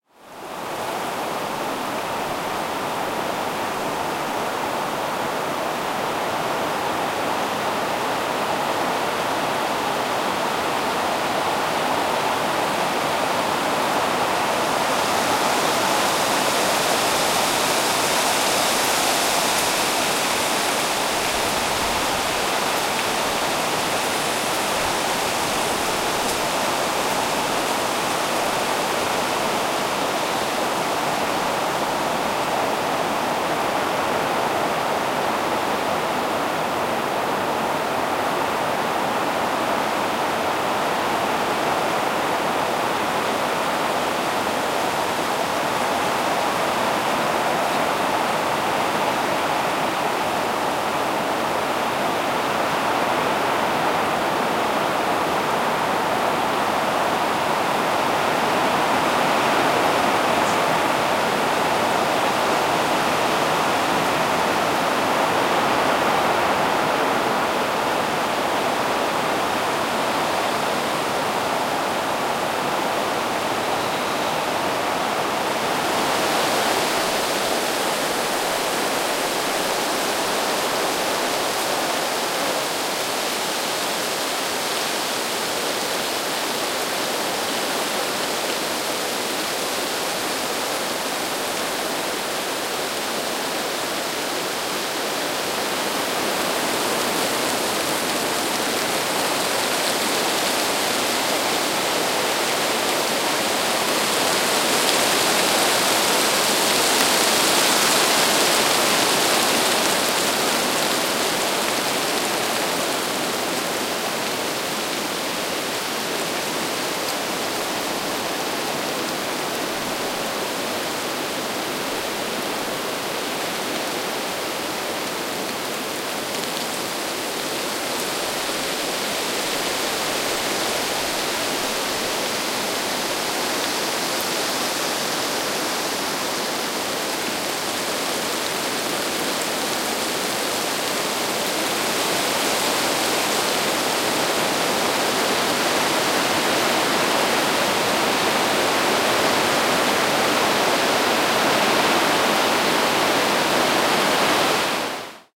Wind blowing through cottonwood trees on a hot June day in a canyon in Grand Staircase/Escalante National Monument, Utah. This recording is a combination of three recordings I made with a Tascam DR-40. I cut out any mic noise and removed some of the lower frequencies to reduce mic noise. You can hear the leaves clattering together pretty well, and no cars or airplane noise.
ambience, breeze, canyon, cottonwoods, desert, field-recording, nature, trees, weather, wind